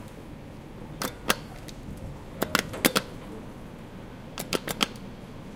Japan Elevator Buttons
Just pressing some buttons of a Japanese elevator.